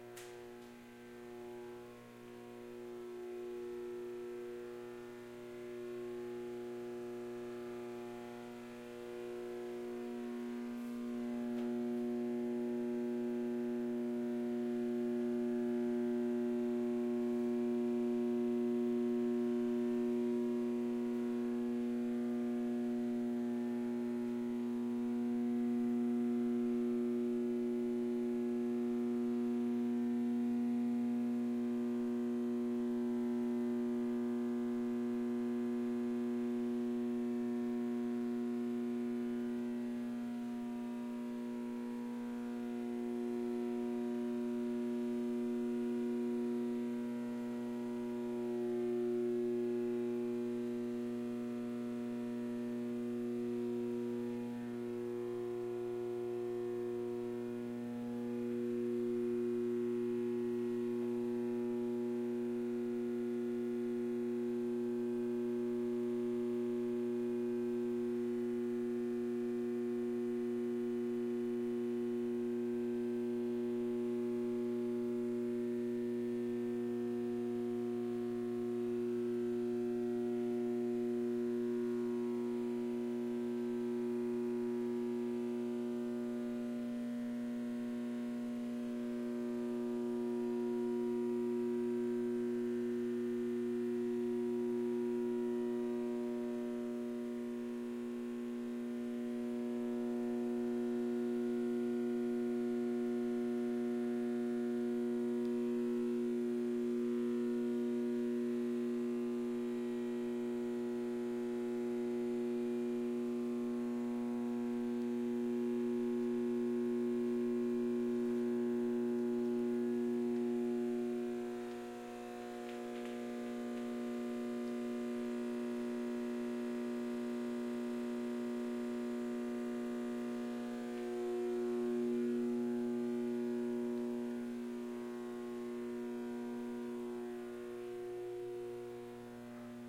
buzz harmonic
Lights buzzing from inside a 1950's Canadian Government nuclear fallout shelter
Binaural recording using CoreSound mics and Marantz PMD 661 48kHhz
bunker, electricity, binaural, cold-war, buzz, lights